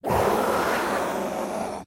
Short processed samples of screams